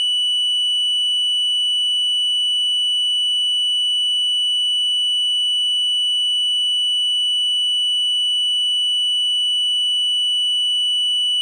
Doepfer A-110-1 VCO Triangle - F#7
Sample of the Doepfer A-110-1 triangle output.
Captured using a RME Babyface and Cubase.
synthesizer, Eurorack, basic-waveform, A-100, wave, sample, waveform, A-110-1, raw, electronic, oscillator, triangle-wave, analogue, triangle, triangular, modular, multi-sample, analog, VCO